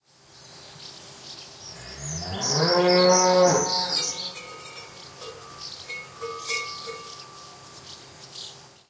Single moo, with birds (Swallow) chirping and cowbell in background . Matched Stereo Pair (Clippy XLR, by FEL Communications Ltd) into Sound Devices Mixpre-3
mooing, farm, barn, cattle